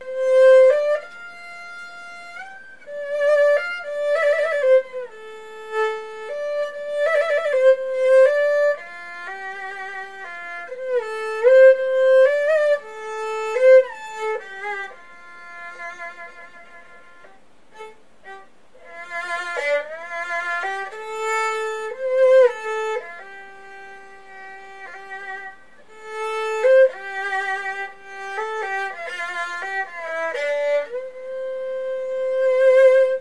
Erhu sample
Sampled from a recording of a practice session with the erhu.